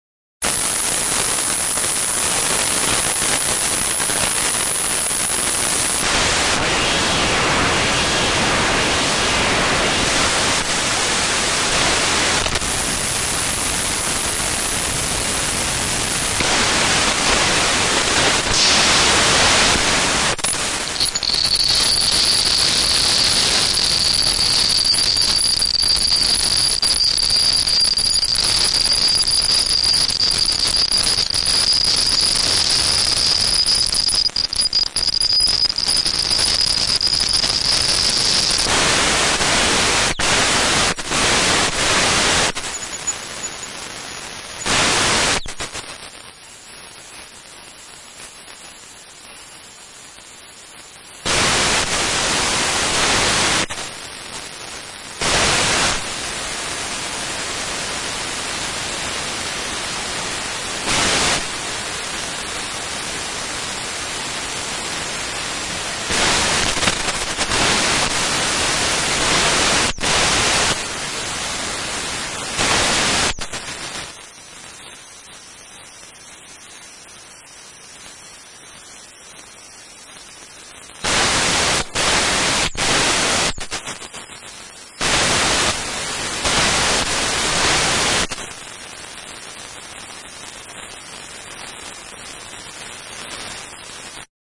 broken Yamaha emp700 a 111022
this is a recording of a broken Yamaha EMP-700. it makes it's own sound [no input] i do nothing excerpt changing patches.